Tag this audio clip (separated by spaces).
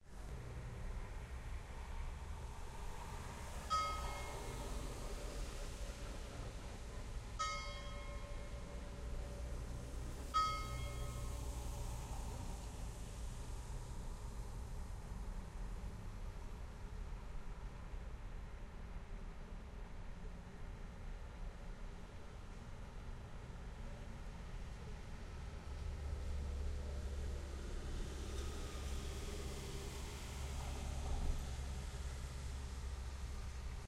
3pm church-bells